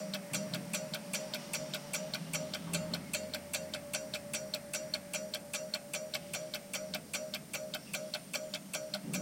kitchen clock 1
Tic-tac of an old battery powered kitchen clock from the sixties,recorded with the mic very close (one cm). Marantz PMD 671, Vivanco EM35